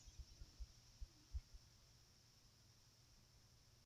Ambient Sound
Recording of windy day